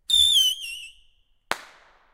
Setting of a small whistling rocket firework
firework, whistle